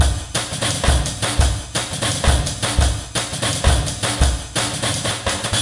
A fast, dirty, hard jungle/dnb loop. Enjoy!